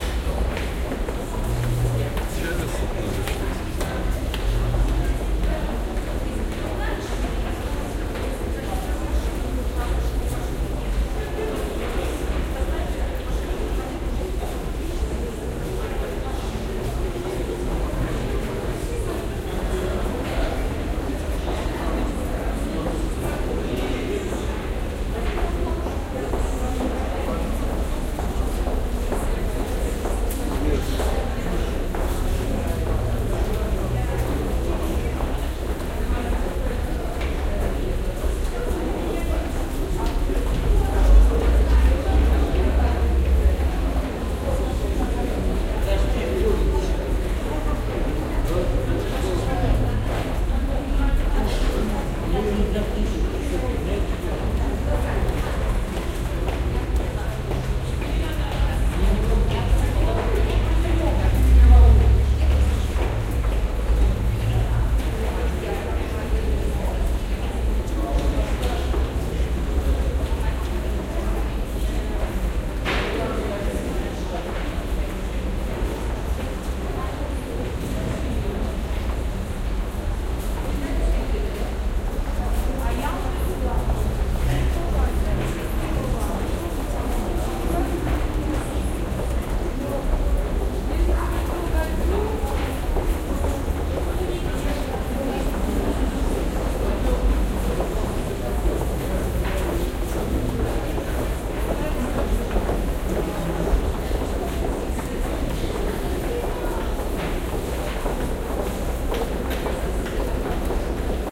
minsk unterfhrung
Standing in a pedestrian underpass. people rushing by.
russia, city, reverb, people, daily-life, field-recording